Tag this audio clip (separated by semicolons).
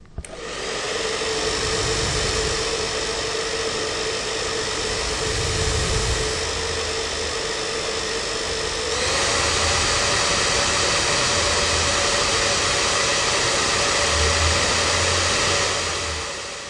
barber; sonsstandreu; barber-shop; hair; secador; haircut; cut